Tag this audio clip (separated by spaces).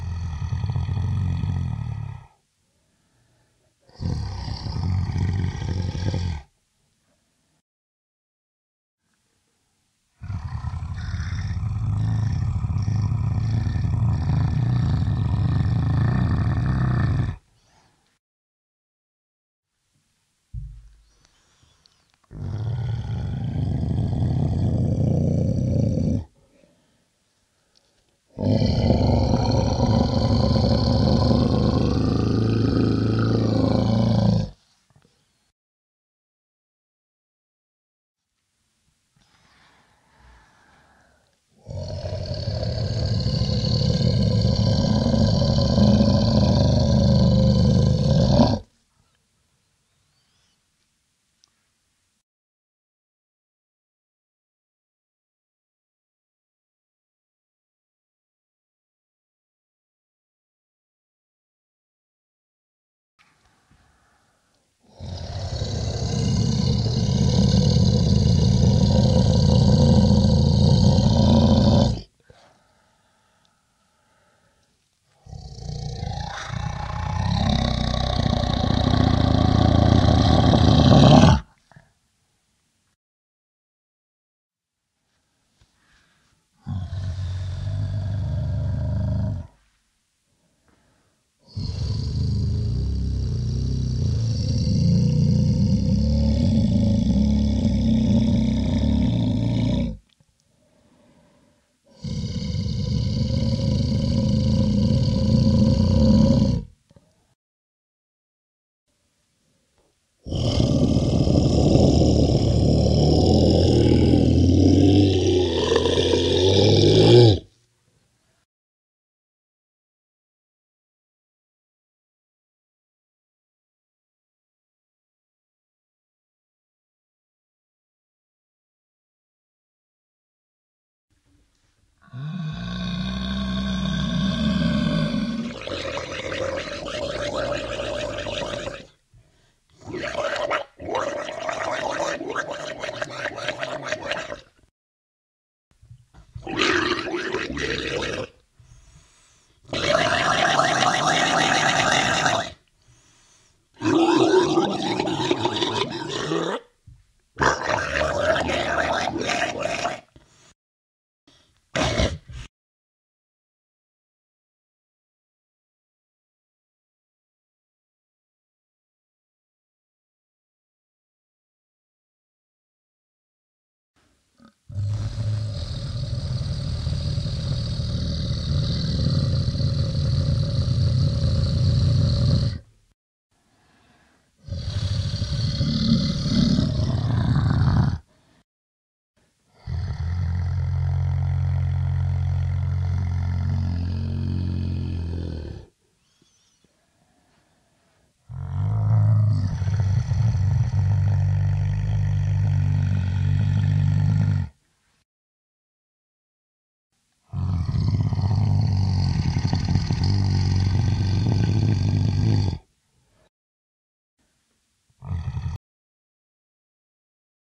beast creature growl horror moan monster roar undead zombie